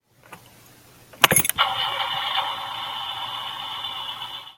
Car turning on sound
A sound of a car starting its engine
It's also known as a car ignition sound
The RPM values of any car engine (when turned on): Low - 1000 RPM, High - 7000 RPM